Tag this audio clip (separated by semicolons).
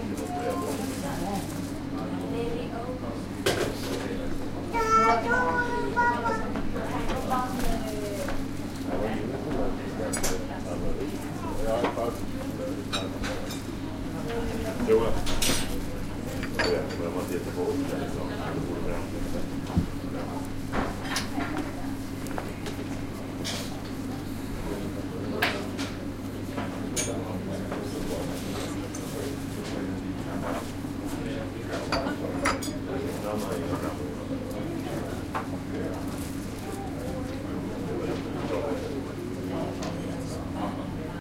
cafe; canteen; chatting; china; dining; dish; dishes; food; interior; people; plates; porcelain; restaurant; serving; tableware; talking